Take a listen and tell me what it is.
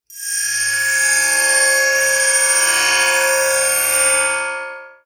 Took a bell ringing and processed the HECK out of it. (reverb) The bell was recorded with a CA desktop microphone. I don't know how you'll use it, but I found it annoying.
annoying, horror, yikes, annoy, what, yipes, fear, bell, scary